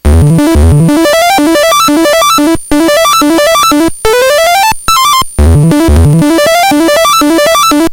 these are from LSDJ V 3.6 Compliments of a friend in Scotland.
Song 1 - 130 BPM
Song 2 - 110
Song 3 - 140
Take them and EnjoI the rush~!
construction, drums, gameboy, littlesounddestructionjockey, lsdj, melodies, nanoloop